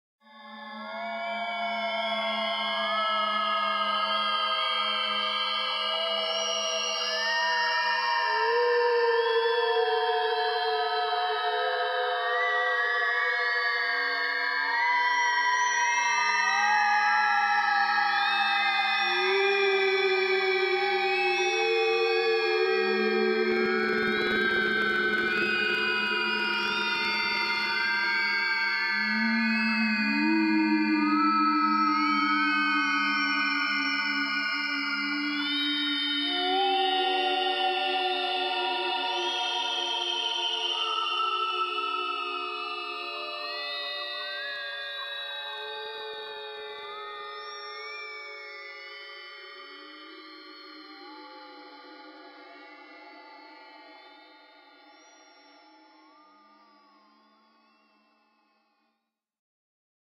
ghostly voices
The sound of ghostly wailing voices. Part of my Strange and Sci-fi 2 pack which aims to provide sounds for use as backgrounds to music, film, animation, or even games.
wailing, ghost, synth, dark, sci-fi, electro, atmosphere, music, voice, ambience, processed, ghostly, electronic